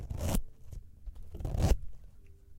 Opening and closing a zipper in different ways.
Recorded with an AKG C414 condenser microphone.